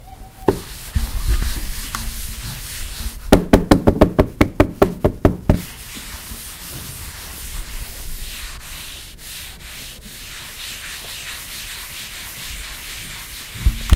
Here is sounds that pupils have recorded at school.
lapoterie, sonicsnaps, france, rennes